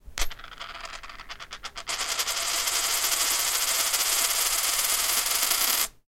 penny spinning on a glass table
change, penny, coin